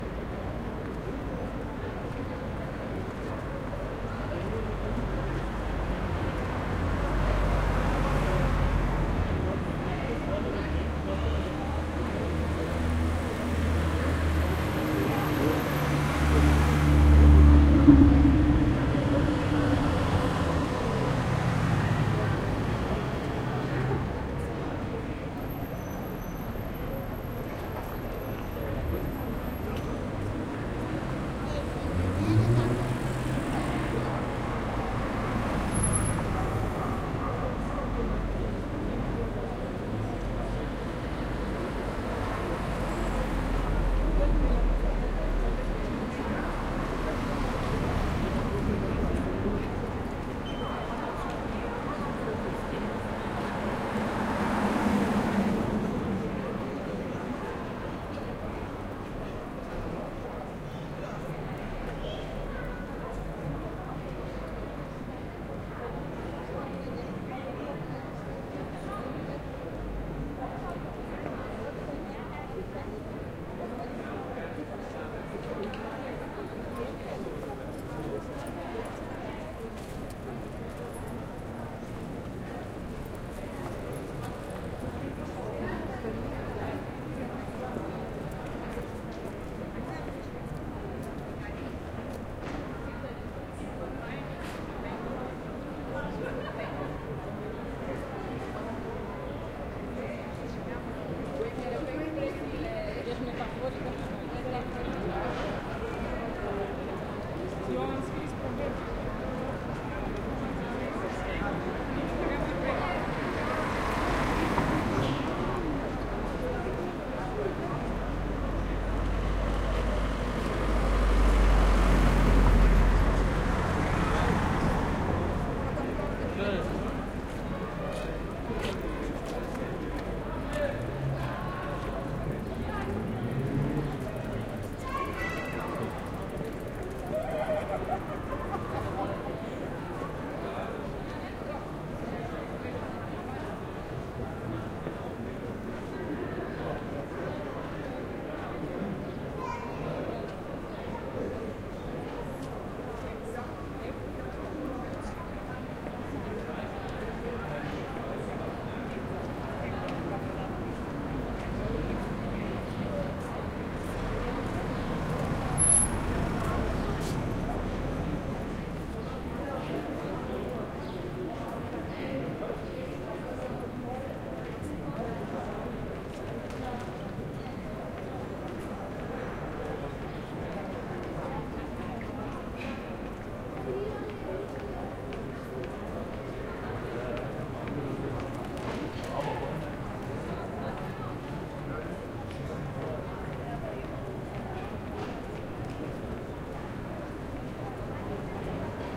Ambience recording of the Rotenturmstraße in Vienna, Austria. Cars and bikes drive by and people are walking around and talking.
Recorded with the Zoom H4n.